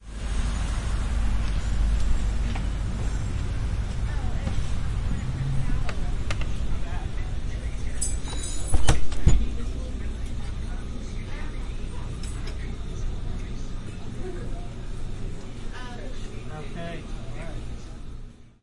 Entering a small, family owned cafe in the morning hours on a Saturday.
ambiance, cafe, cars, door, enter, female, man, old-man, trucks, vehicles, voice, woman